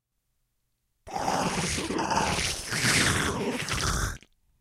Monster suction
Entirely made with mouth sounds. Recorded with my Audio Technica ATM33.
monster, growl, suction